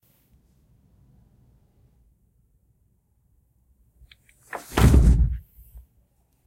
Fall on carpet
The sound of a person falling onto a carpet.
carpet, down, fall, falling, floor, onto